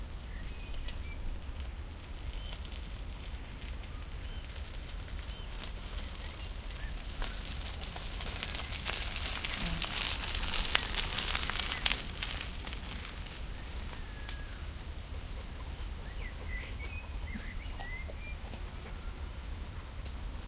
a bicycle driving past on a gravel road from left to right in a quiet
park. Birds are singing in the background. (Stereo recording PMD670 with AT825)
bicycle, park, gravel